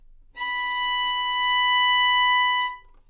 Part of the Good-sounds dataset of monophonic instrumental sounds.
instrument::violin
note::B
octave::5
midi note::71
good-sounds-id::3669
multisample, single-note, B5, neumann-U87, good-sounds, violin